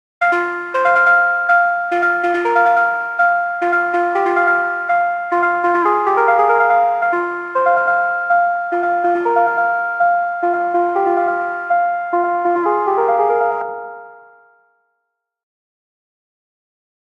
Filtered high frequency snyth melody